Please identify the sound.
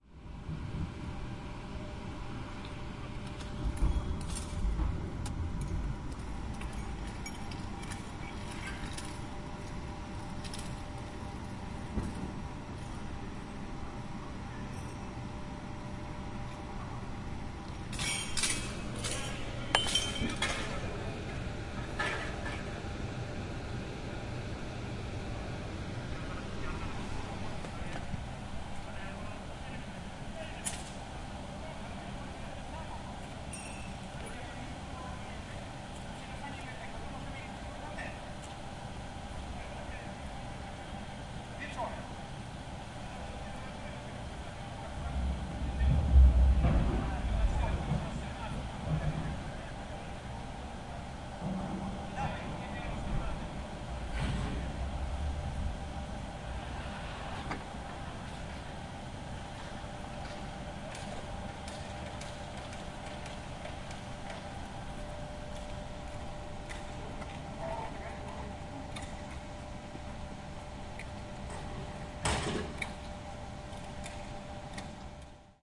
110805-truck in loading ramp
05.08.2011: sixth day of the ethnographic research project about truck drivers culture.Oure in Denmark. he first day of the tree-day pause. ambience in front of the loading ramp. swoosh of the fruit-processing plant. some talks in the background.
bang, banging, conversation, denmark, drone, factory, field-recording, loading-ramp, metal, oure, rifle, swoosh, truck